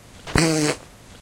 fart poot gas flatulence flatulation explosion noise weird
explosion, flatulation, flatulence, gas, weird